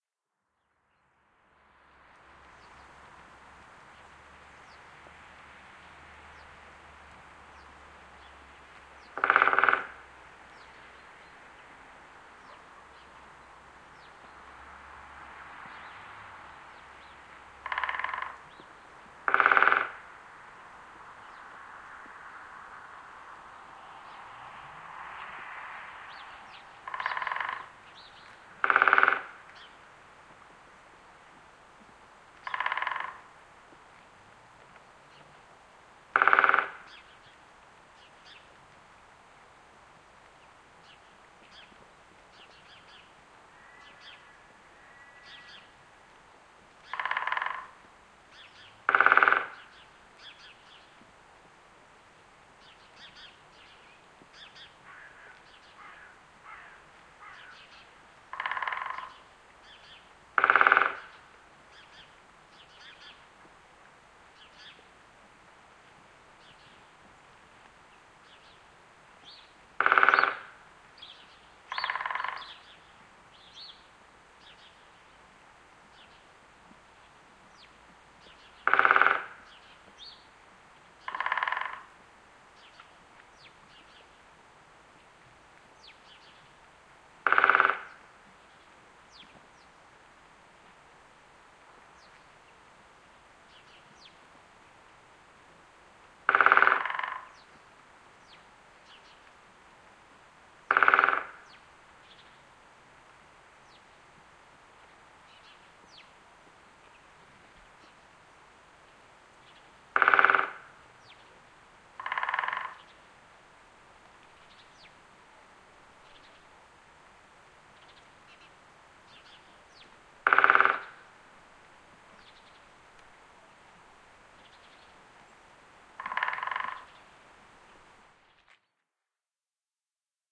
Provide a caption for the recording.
A stereo field-recording of two Woodpeckers drumming separate trees in a Scots Pine (Pinus sylvestris) stand. Recorded during a powdery snow shower. Rode NT-4 > FEL battery pre-amp > Zoom H2 line in.